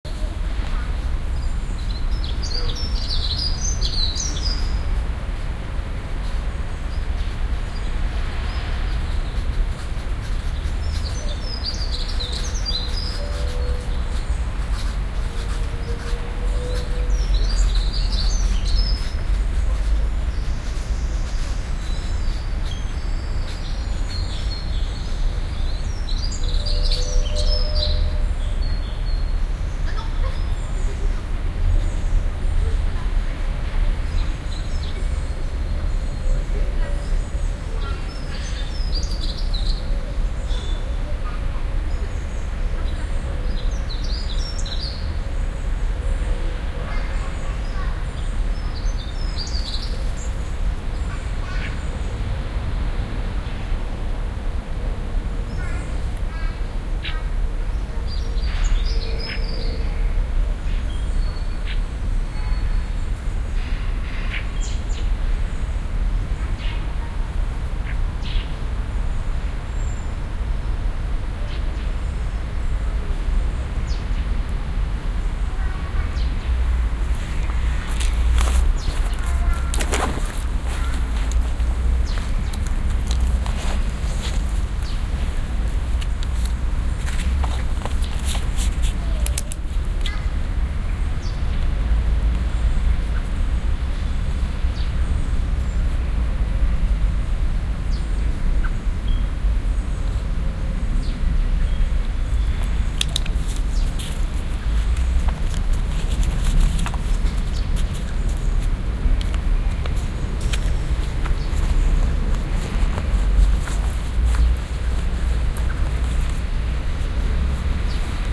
High St Kensington - Birds singing
ambiance, ambience, ambient, atmosphere, background-sound, city, field-recording, general-noise, london, soundscape